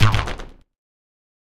A cartoony twang made from a hot XY recording of a rubberband with some distortion and delay.
rubberband, twang, cartoony